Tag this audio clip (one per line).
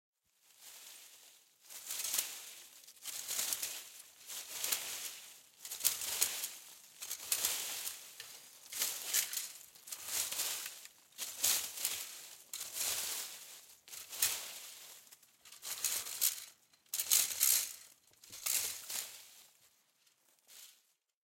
CZ
Czech
Panska